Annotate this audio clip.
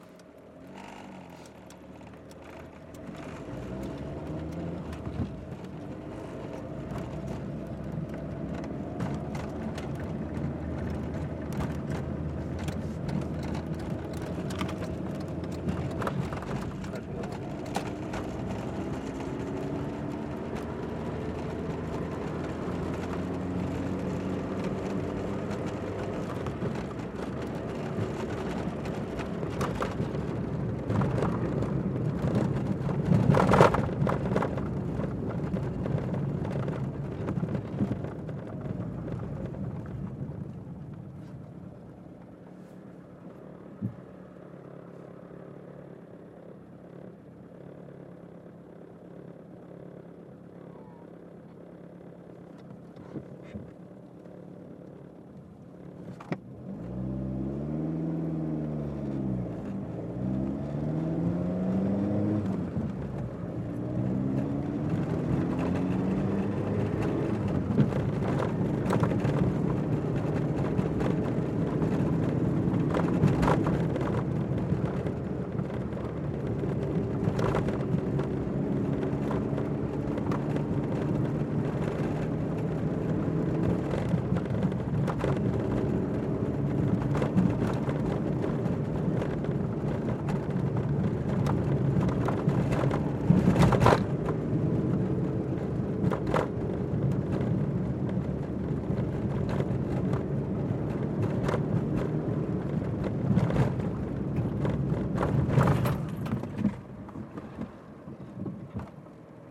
Ambiente - interior coche circulando
reccorded inside a car
MONO reccorded with Sennheiser 416
car, inside-a-car